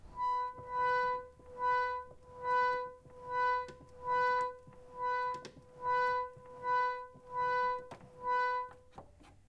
Pump Organ - Mid B
Recorded using a Zoom H4n and a Yamaha pump organ
b, b3, note, organ, pump, reed